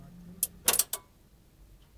Tv 100Hz off
100hz, off, tv